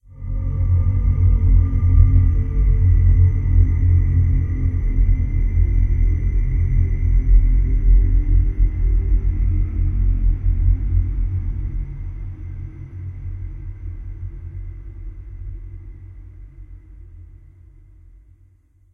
Atmospheric sound for any horror movie or soundtrack.